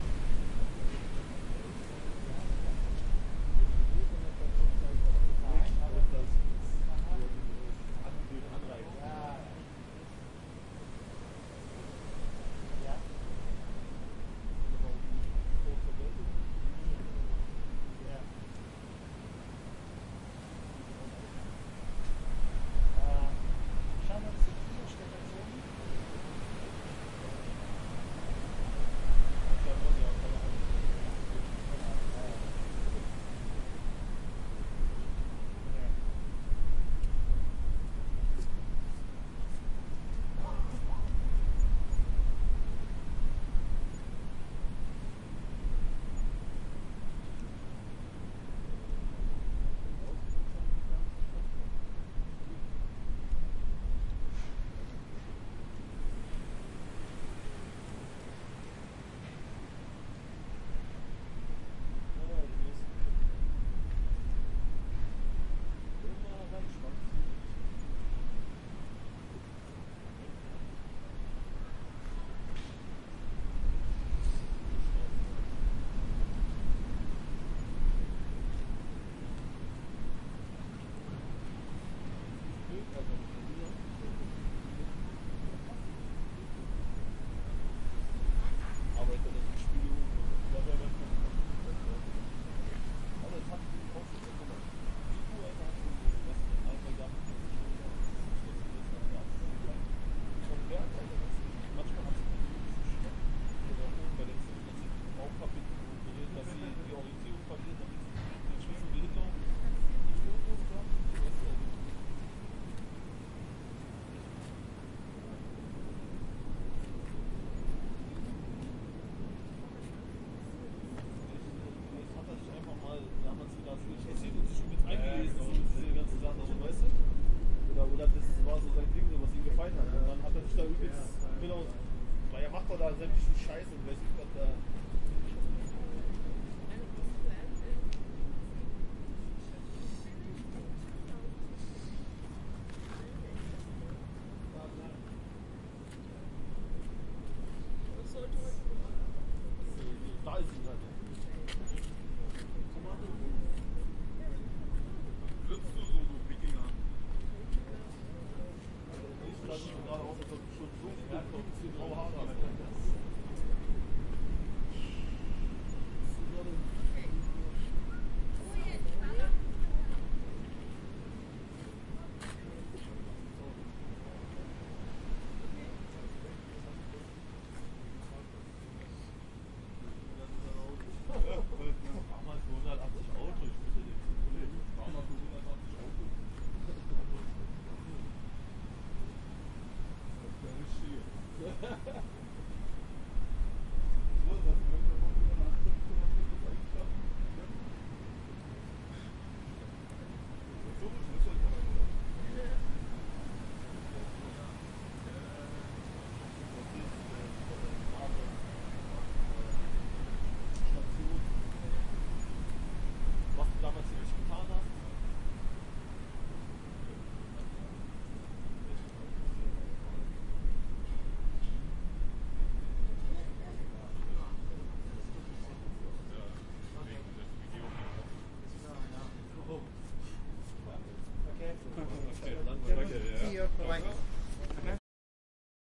Quiet Berlin Street day with wind noise
A quiet street in Berlin, Zoom H4N.
field-recording, foley